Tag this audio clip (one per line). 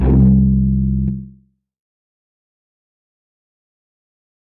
one-shot
tb
303
acid
synth